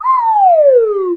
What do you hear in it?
FX swanee whistle down
ACME swanee whistle single slide down. Recorded in stereo with a Zoom H4n Pro.
slide, whistle